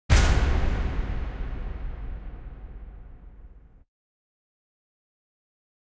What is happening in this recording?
bassy hit(anvil)
A reverberated bass drum hit